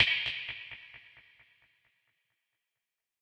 BATTERIE PACK 2 - Dubby stick delays
BATTERIE 02 PACK is a series of mainly industrial heavily processed beats and metallic noises created from sounds edited within Native Instruments Batterie 3 within Cubase 5. The name of each file in the package is a description of the sound character.